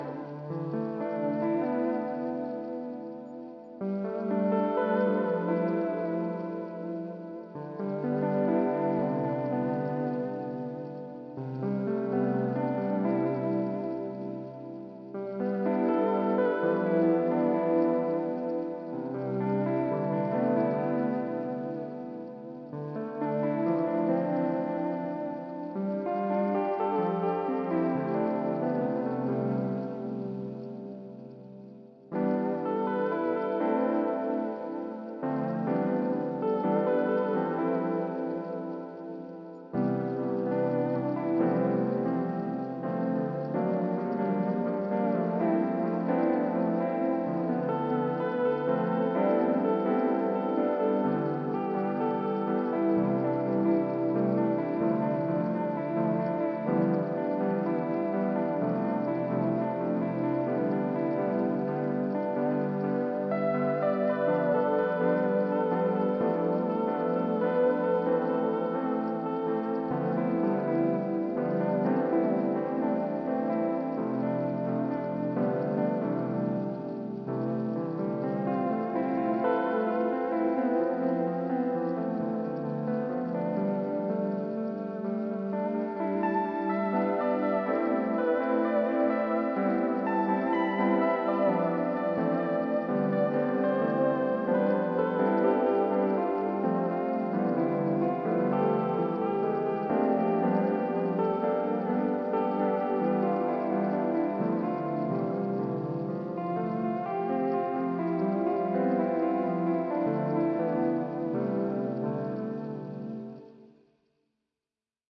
crappy lofi progression
sounds like crap but sounds very warm and toasty and lo-fi. made with Arturia Jupiter 8 and Mercuriall Audio Chorus WS1 with Audiority Reels